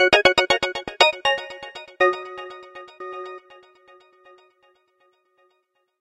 I made these sounds in the freeware midi composing studio nanostudio you should try nanostudio and i used ocenaudio for additional editing also freeware
sound, event, sfx, intros, startup, bootup, desktop, intro, effect, application, clicks, bleep, game, blip, click